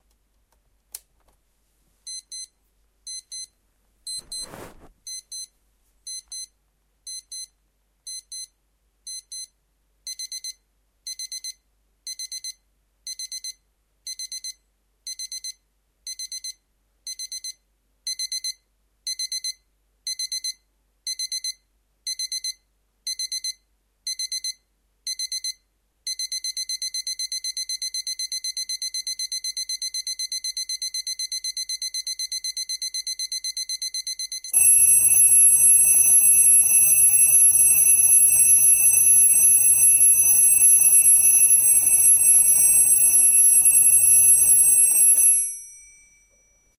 CasioLC-DM-550
Testing sound recorded by Olympus DM-550 with Low sensitive microphone
low, recording, alarm, clock, sample, compresion, olympus, DM-550